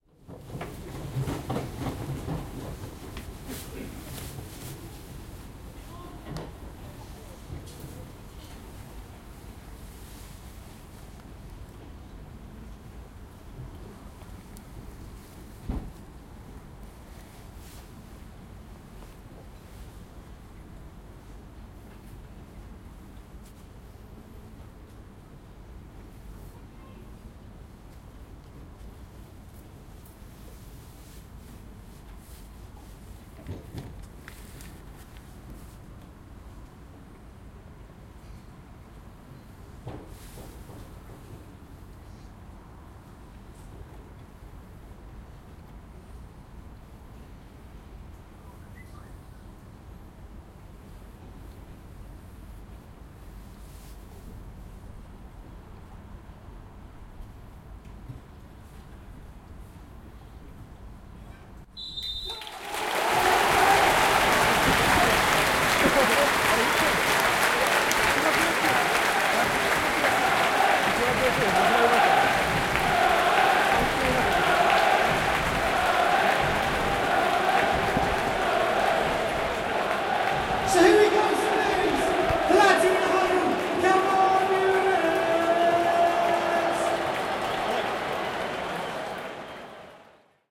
Football Crowd - Minute Silence then whistle blow - Crowd cheer - Southampton Vs Hull at Saint Mary's Stadium
Recorded at Southampton FC Saint Mary's stadium. Southampton VS Hull. Mixture of oohs and cheers. Before the game there was a minutes silence, took the opportunity and recorded it and the cheers after. The sound of 60,000 people silent and then a roar when the ref blows his whistle.
Boo, Cheer, Football, Football-Crowd, Large-Crowd, Southampton-FC, Stadium